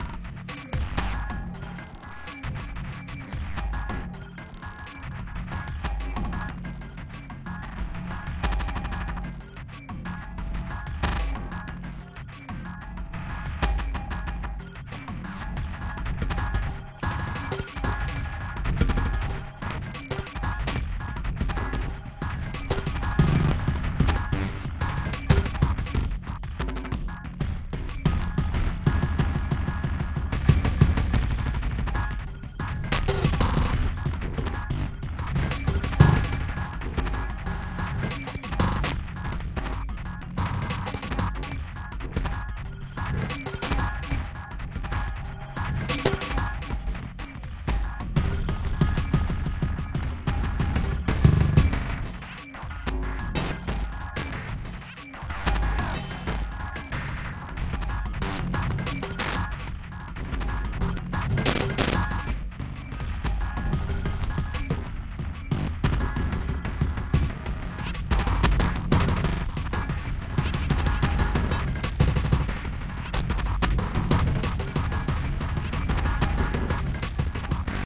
Sunny Cities and who they remind me off that I have been too in the past 5 years. Ambient Backgrounds and Processed to a T.
distorted, glitch, paste, atmospheres, rework, clip, ambient, backgrounds, saturated